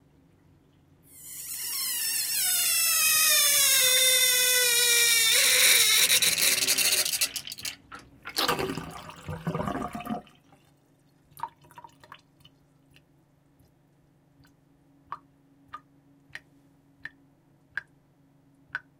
Kitchen Sink Draining
Draining sound of a kitchen sink recorded with two hydrophones and a M/S mic setup.
Thanks.
bathroom, drain, faucet, Kitchen-sink, sink, water